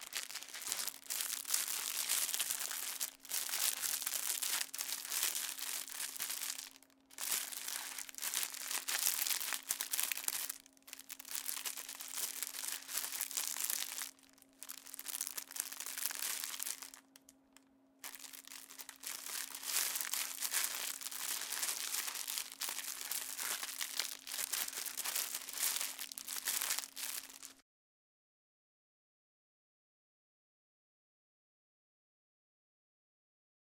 Plastic crumple
rubbing plastic wrap
rub, wrap